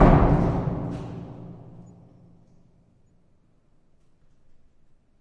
Queneau porte reson 02
ouverture d'une porte dans un hall, grosse reverbération
door, dreamlike, enormous, impulse, psychedelic, response, reverb